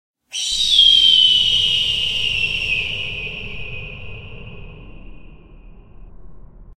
Gryffin Cry
All my sounds were created for a motioncomic I created called: Kay & Gojiah... I did not create these from scratch, but instead, remixed stock sounds of different roars, growls, breathing, etc. and fiddled with their settings until I got a sound I felt satisfied with. I thought the best thing to do with them after the project was done is to share.